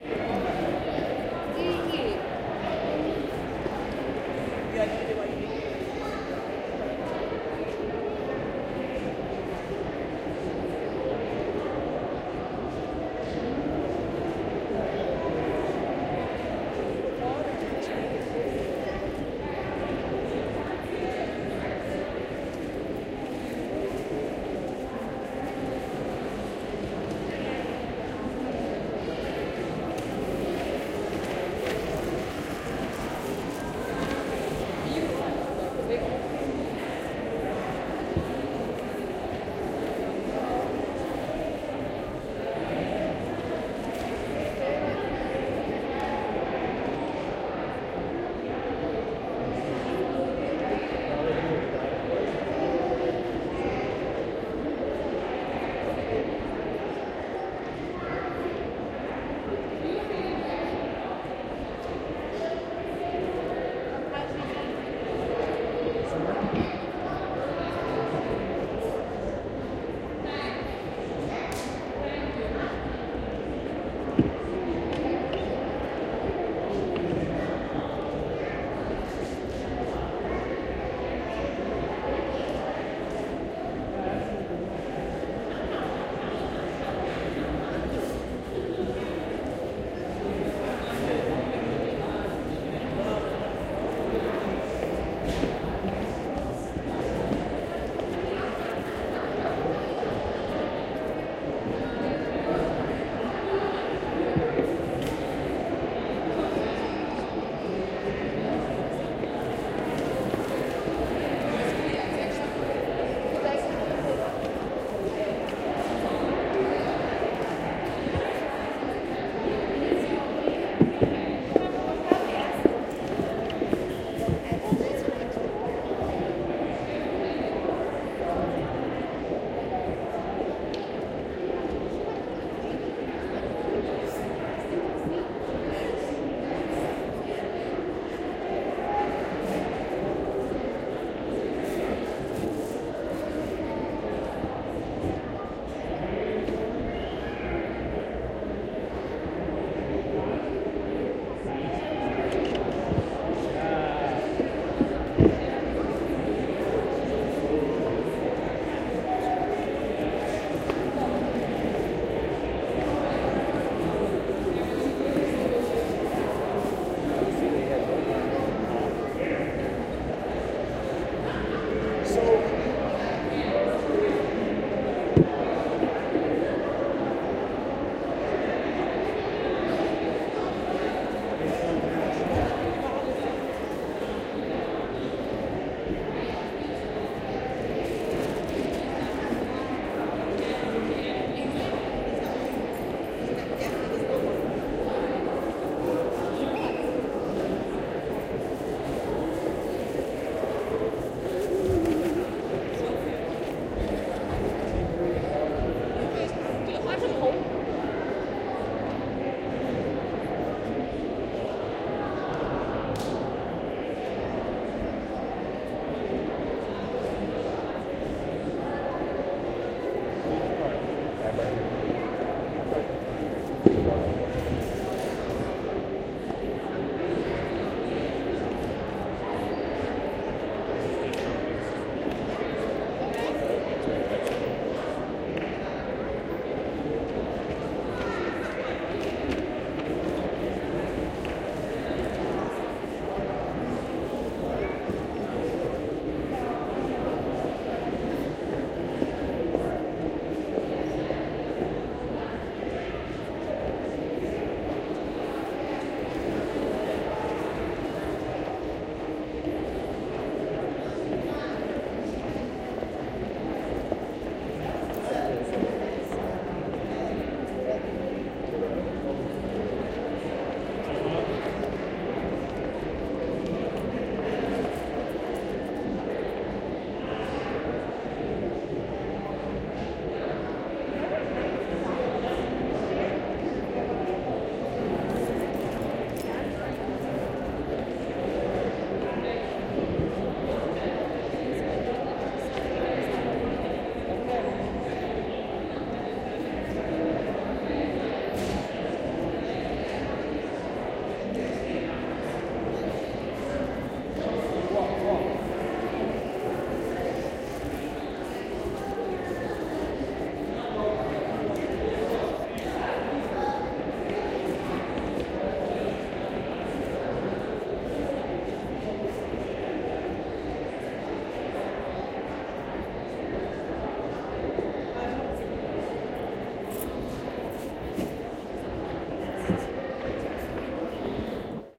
US Shopping mall (Great Lakes Crossing) - internal
The Great Lakes shopping mall main thoroughfare on a Saturday afternoon, passing traffic